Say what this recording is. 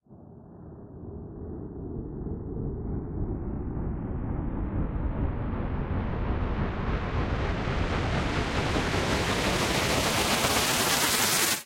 Long stereo panned riser (fade in) effect.